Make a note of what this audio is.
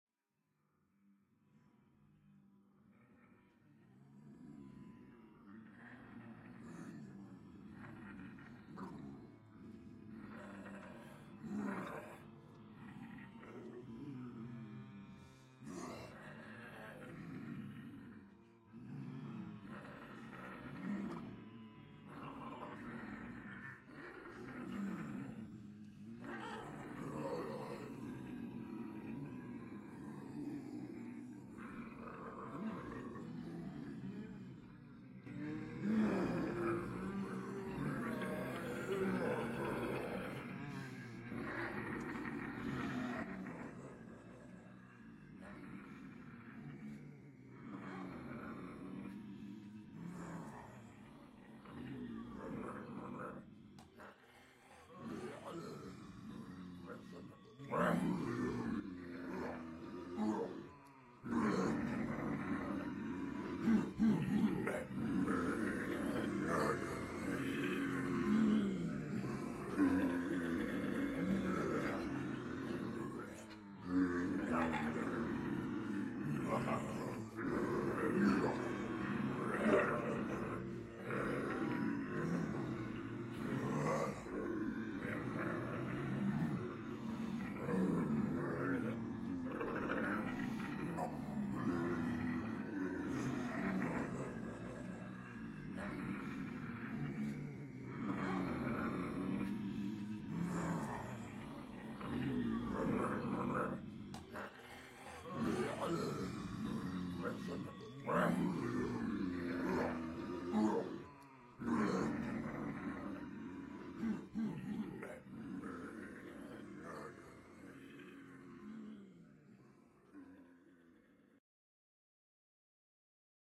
Zombie Group 1D
Multiple people pretending to be zombies, uneffected.